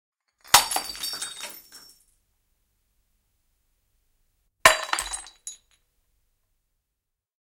Kuppi rikki / Porcelain cup, small coffee cup, falls and breaks on the floor
Posliinikuppi, pieni kahvikuppi, putoaa ja rikkoutuu lattialle pari kertaa.
Äänitetty / Rec: Analoginen nauha / Analog tape
Paikka/Place: Yle / Finland / Tehostearkisto, studio / Soundfx archive studio
Aika/Date: 13.09.1985